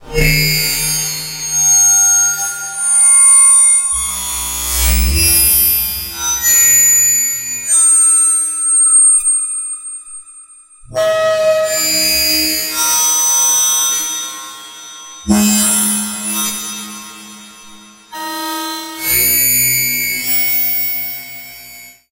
ambienta-soundtrack heishere-nooil
a digital scape from the "Ambienta" soundtrack, chapter 3
ambience, ambient, annoying, atmosphere, cinematic, contemporary, digital, disturbance, effect, experiment, extreme, feedback, film, fx, heavy, movie, scoring, scream, sound-effect, soundesign, suffer, sweep, synth, synthesizer, theatre